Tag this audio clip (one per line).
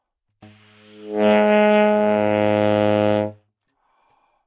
good-sounds
Gsharp2
multisample
neumann-U87
sax
single-note
tenor